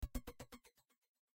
cabo del 07
efeitos produzidos atraves de um cabo p10 e processamentos!!